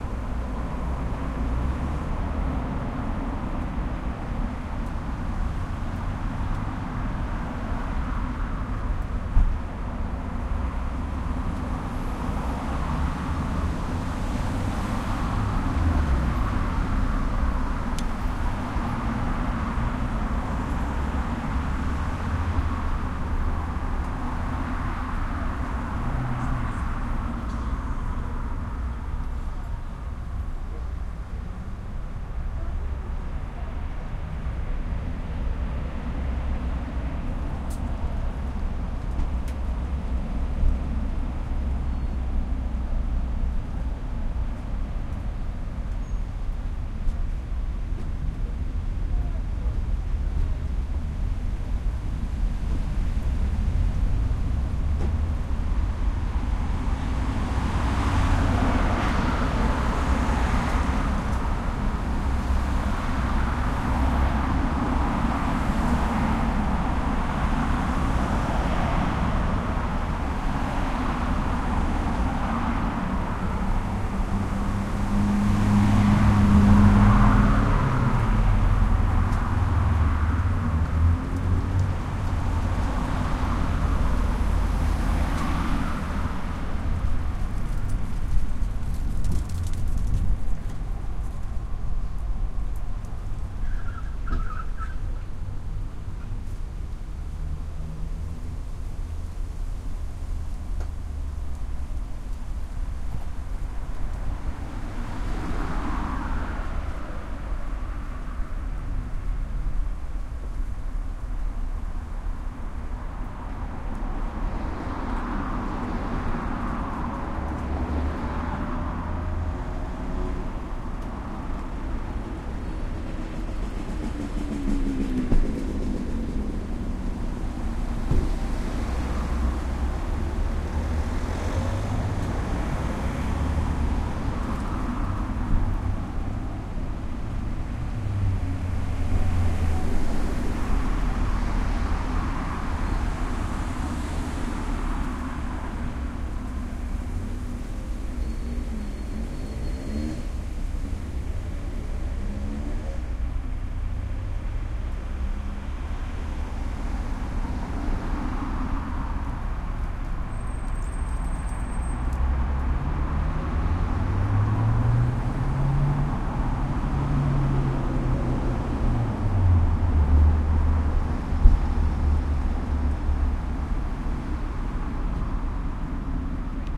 Continuous traffic noise from a busy road in Gloucester